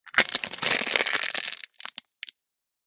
A longer, slower (than the other sounds in the pack) crush of an egg-shell under foot. See the pack description for general background.